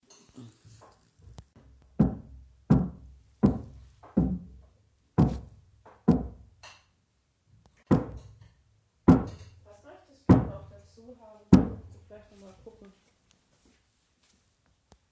Recorded during inhouse construction work with H2N, no editing.